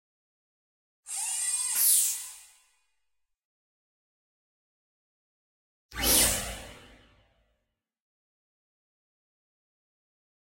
Space Title SFX
2 sounds actually, I used this for a title reveal and dissolve sequence. Sound of a servo motor mixed in with a click and whoosh, followed by a whoosh. Could be used for anything alone or together. The gap in the middle is silence.
mechanical, robot, servo, space, tech, title, whoosh, zip